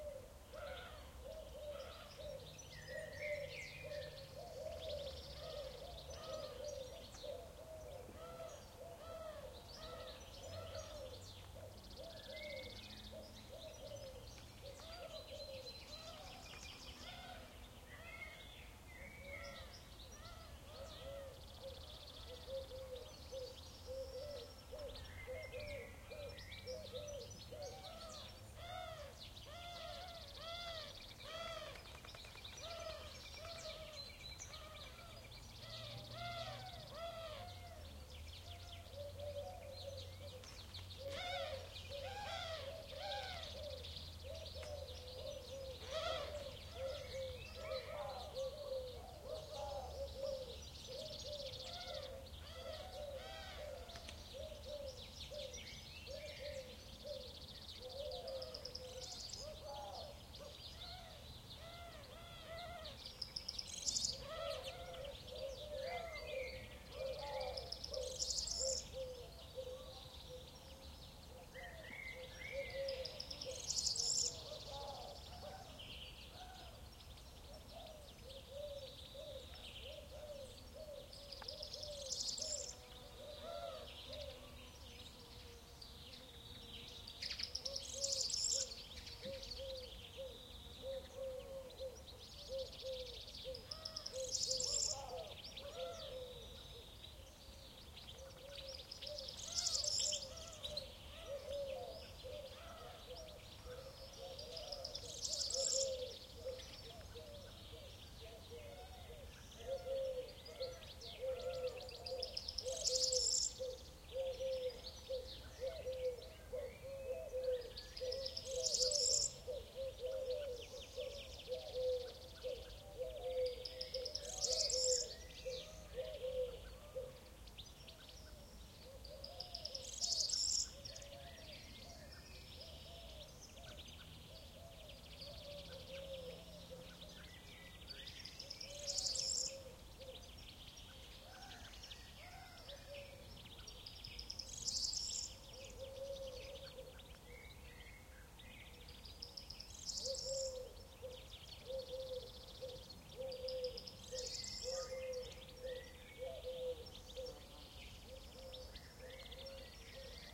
Recorded at the Hierapolis roman ruin in Turkey on the Sony M10.
If my sounds have been useful, you can support me and receive a 1.6GB collection of recordings.